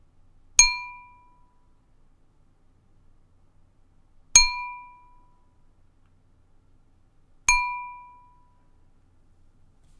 Just a glass ding. Sounds like litte bell.
Microphone AT-2020